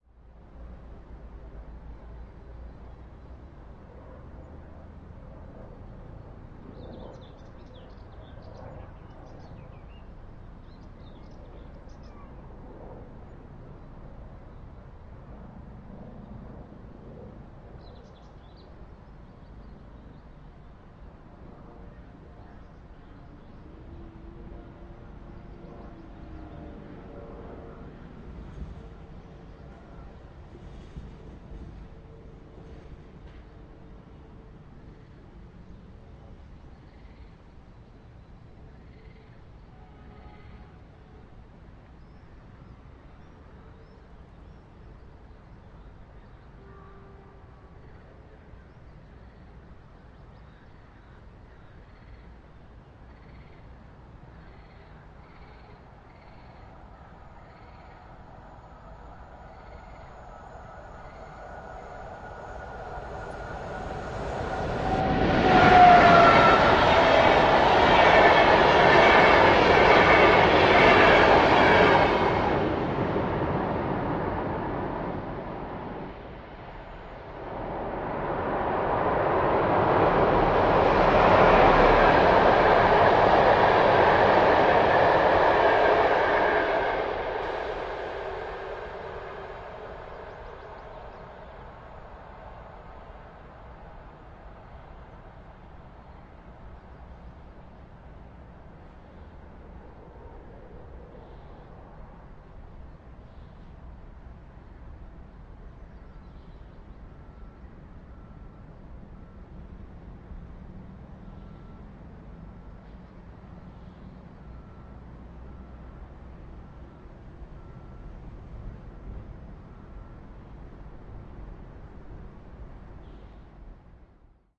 passing trains 080513
08.05.2013: about 13.00. Sound of two passing trains. Poznan in Poland on Ostrow Tumski.
zoom h4n+internat mics
noise, city, poland, poznan, train